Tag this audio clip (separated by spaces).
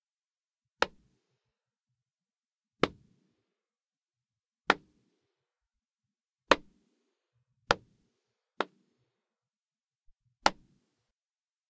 hand clap